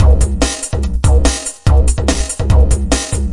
Hardbass
Hardstyle
Loops
140 BPM